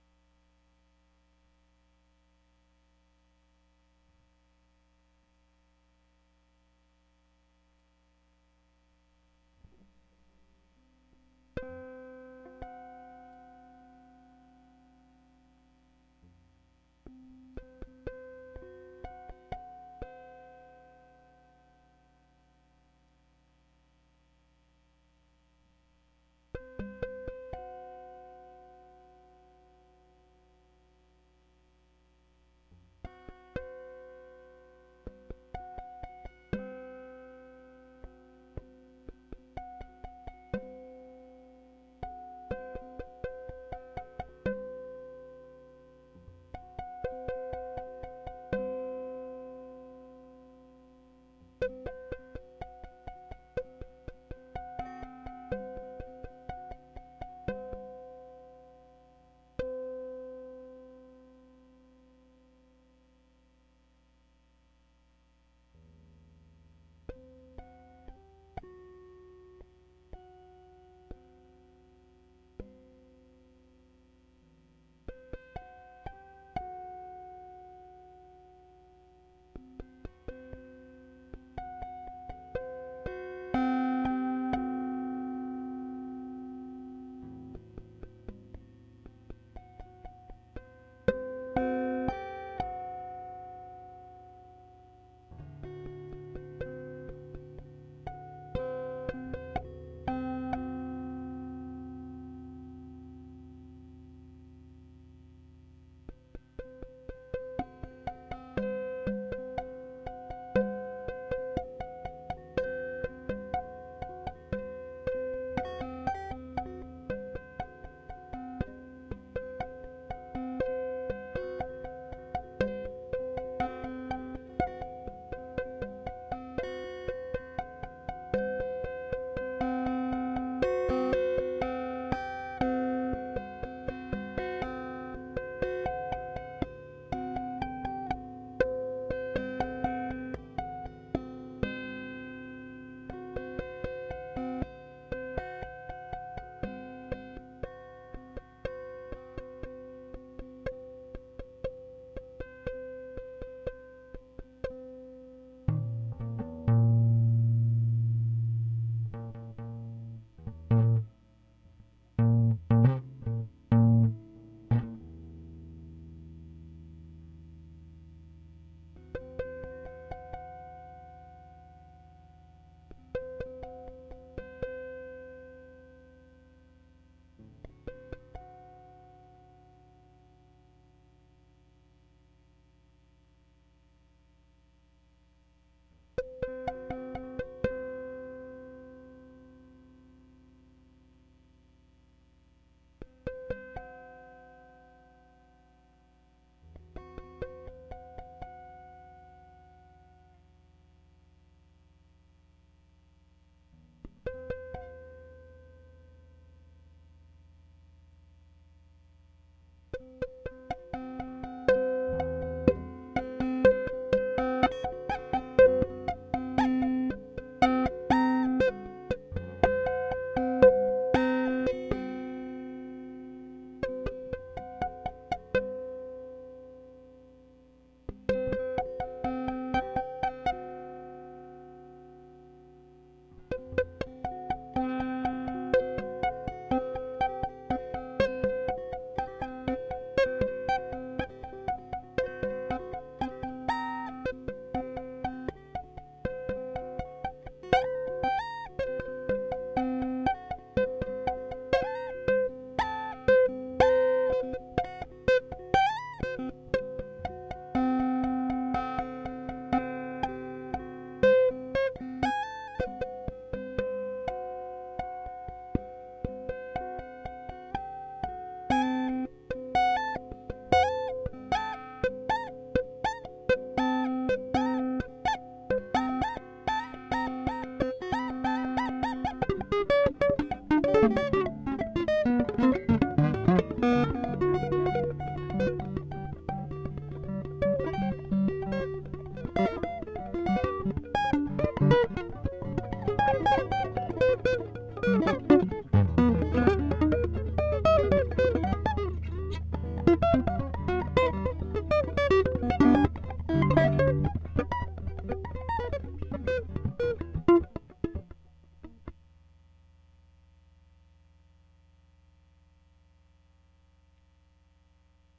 Experimental guitar
Guitar tapping it takes a while to begin
Guitar, experimental